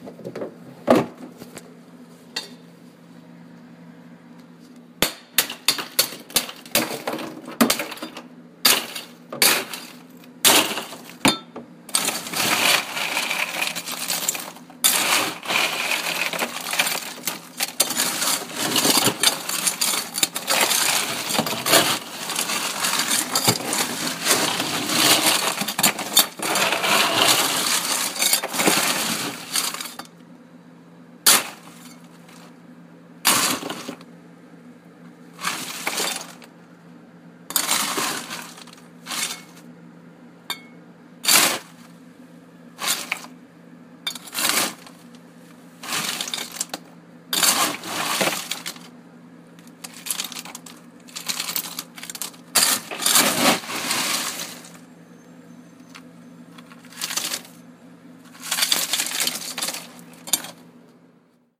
breaking up, scooping, and dropping lots of ice in a big ice machine
Recorded at a coffee shop in Louisville, CO with an iPhone 5 (as a voice memo), edited in Audacity